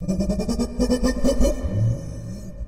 Scary Monster Growling
This is a sound created out of my laughter